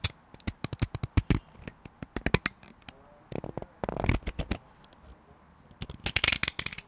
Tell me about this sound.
Multiple dull hits
buzz, latch, machine, mechanical, whir